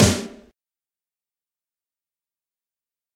thrucha snare
hiphop, trance, house, snare, drum, dnb, psybreaks, drumstep, psychill, breakbeat, dubstep